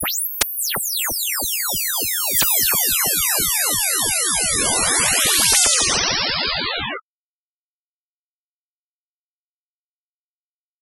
ray gun noise
blaster, ray-gun, science